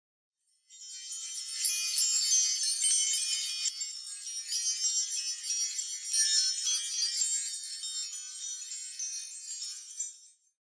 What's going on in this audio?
New Magic
a mystical enclosed bell